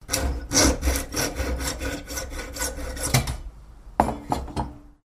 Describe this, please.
Electric circular saw
Recorded with digital recorder and processed with Audacity